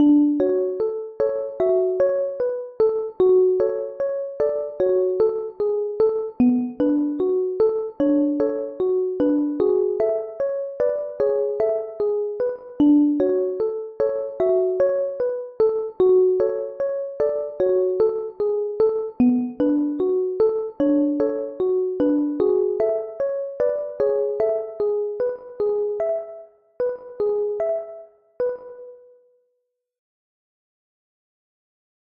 night ringtone/alarm sound
melancholic, mobile, musical-box, song, cell, ring-tone, melody, music-box, cute, telephone, musical, cellphone, loop, night, ambient, musicbox, music, alert, dream, ringtone, sample, tune, simple, ring, dreamlike, android, sad, easy, phone, bgm